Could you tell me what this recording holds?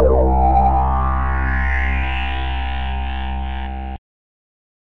A acid one-shot sound sample created by remixing the sounds of